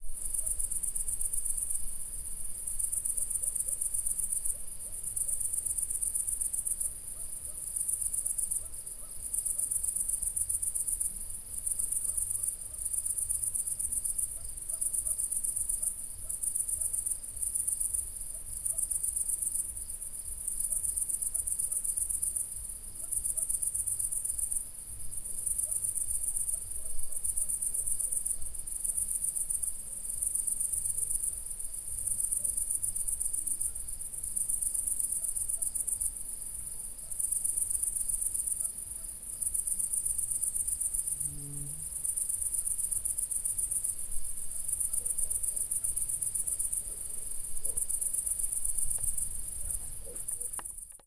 Night ambience with crickets.